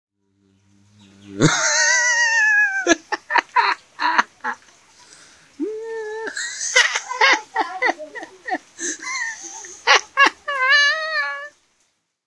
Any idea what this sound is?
Aukward smile
Awkward-smile bad-smile smile laughing-loud smiling fun audacity funny
audacity, Awkward-smile, bad-smile, fun, funny, laughing-loud, smile, smiling